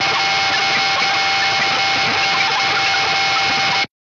Another digital noise sample produced with Mr Alias2 VST.This makes use of the filter capabilities, the LFO sine wave and also ring modulation between the two main oscillators.The LFO sine wave creates an interesting set of background digital "pings".All in all, to me it sounds like the sound a large alien computer aboard a UFO should make.